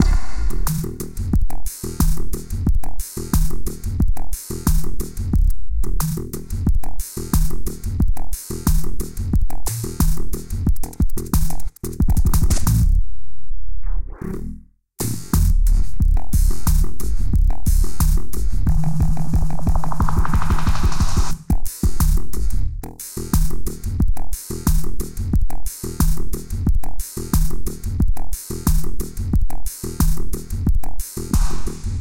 Made with multiple FM synthesisers